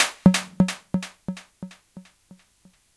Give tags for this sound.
drop; dry; dub; drum; electronic; synthetic

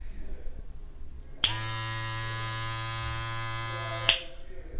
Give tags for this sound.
buzzing; buzzer; head

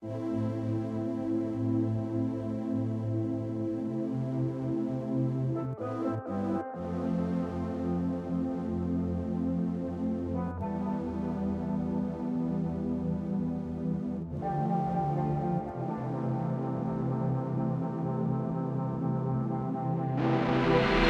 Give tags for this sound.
trip; synth; Field-recording; chill

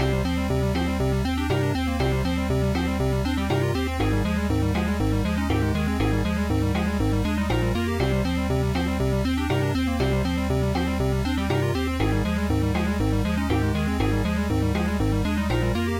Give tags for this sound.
synth; drum; Retro; 120-bpm

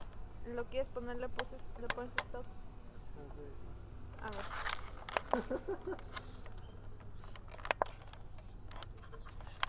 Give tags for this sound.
ambience; city

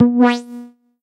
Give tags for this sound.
effect; fx; game; select; sfx; sound; transition

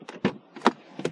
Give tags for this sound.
door; open; car

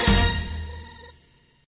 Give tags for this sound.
slow; selection; correct; right; stab

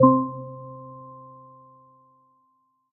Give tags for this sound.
achievement; application; beep; bleep; blip; bloop; button; buttons; click; clicks; correct; end; event; game; game-menu; gui; lose; menu; mute; puzzle; sfx; startup; synth; timer; ui; uix; victory; win

